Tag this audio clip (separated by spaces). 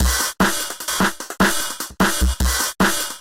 drumloop amen